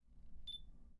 17-Bip camara
Sound electronic button
bip, button, click, electronic, press, synthetic